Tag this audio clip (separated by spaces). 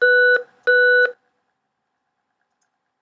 beep call communicator ring star-trek